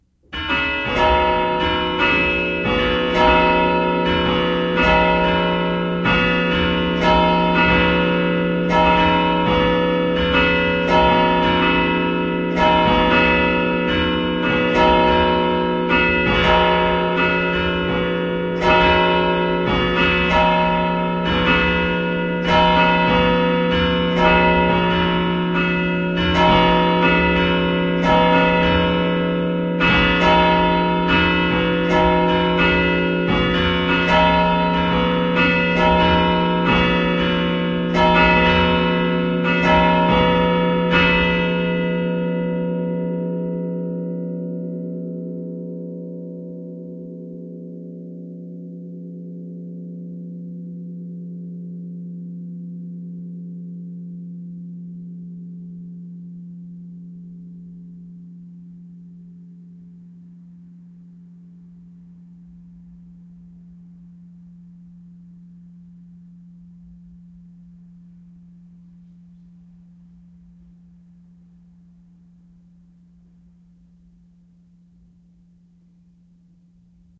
bec bells pealing cropped
four-bell tower at Bethesda Church, Saratoga Springs NY USA - electronic control rings the bells at random. Long tail fade to silence at the end. SM58 up in the tower to Behringer USB interface to Audacity, trimmed and amplified.
ringing, bells, church, pealing